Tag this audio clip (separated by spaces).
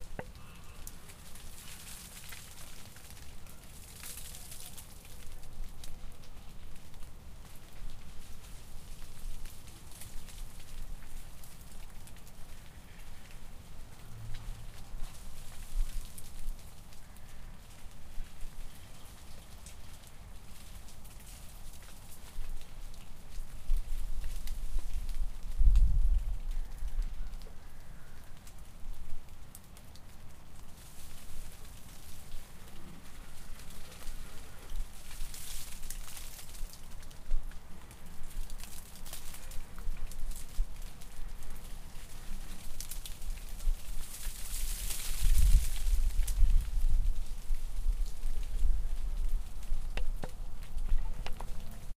water snow